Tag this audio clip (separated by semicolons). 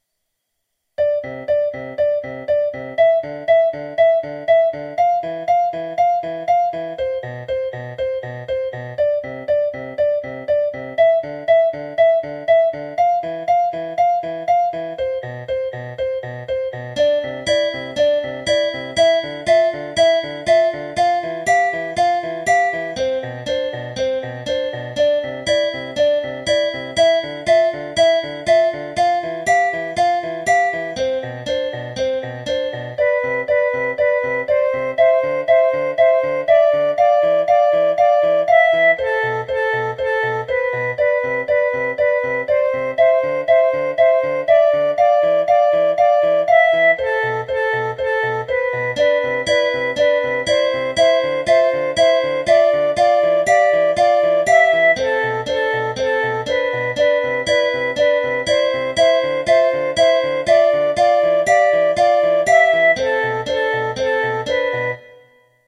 ambiance; Fast; fun; instruments; jam; Keys; Piano; sample; Simple